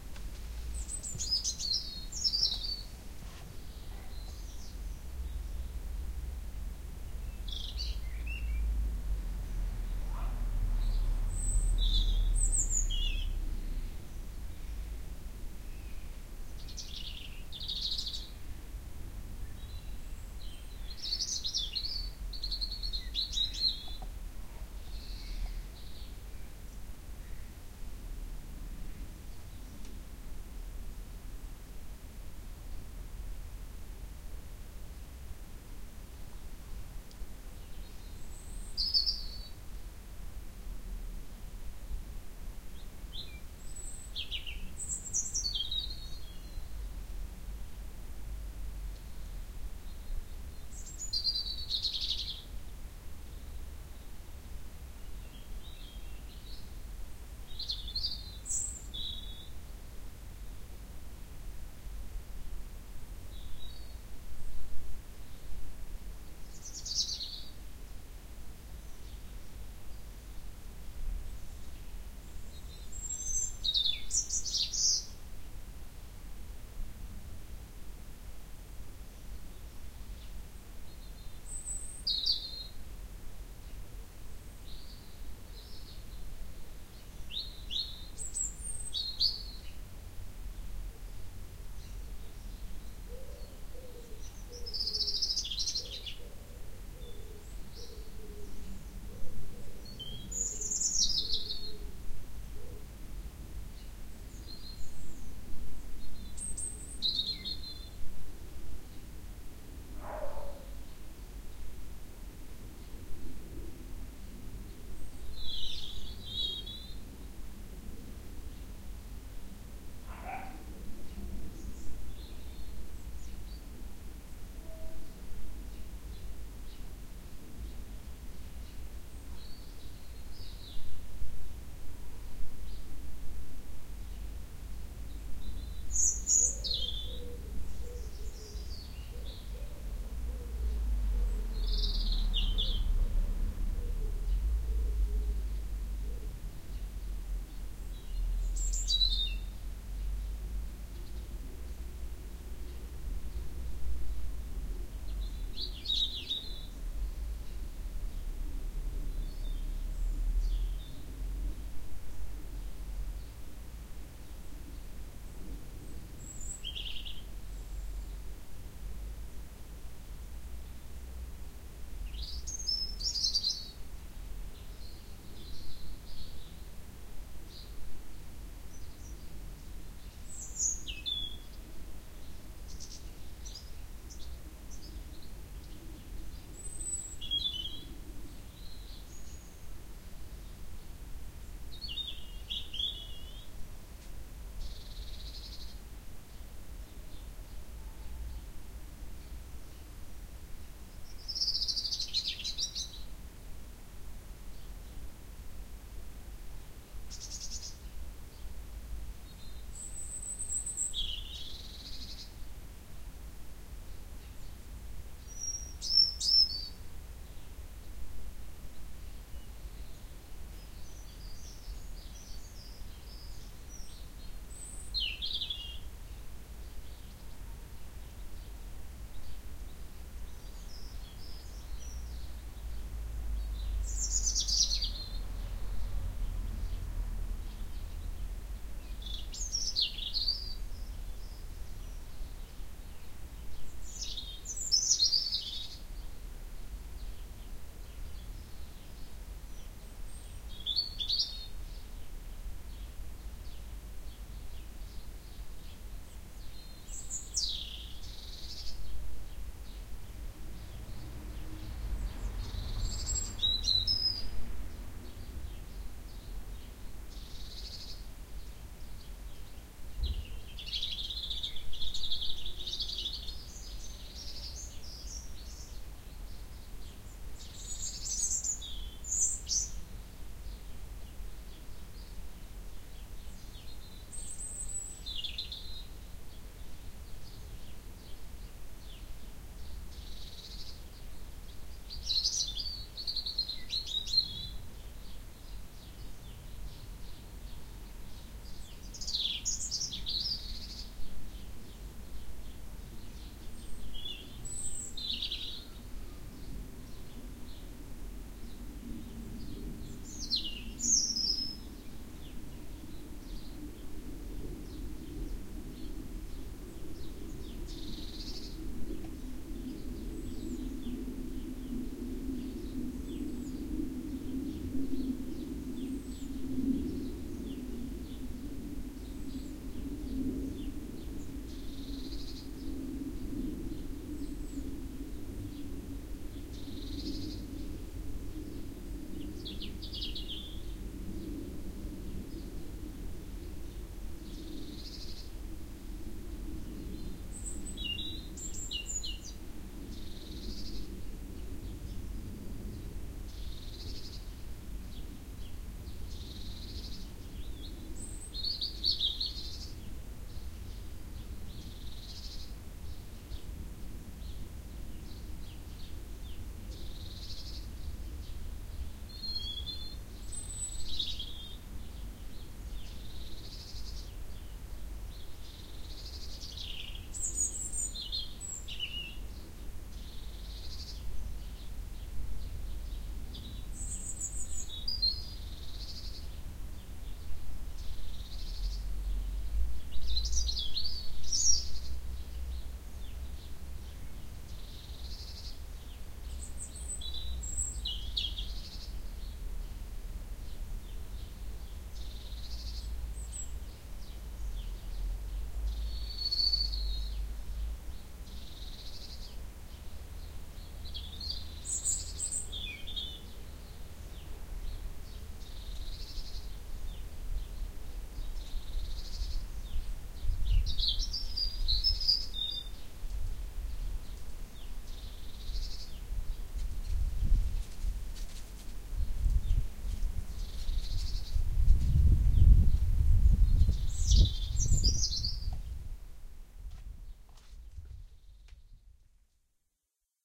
The ambient sounds of an urban garden.